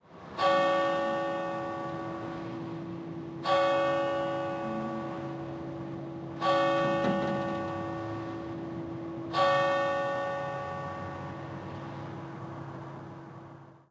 From summer 2008 trip around Europe, recorded with my Creative mp3 player.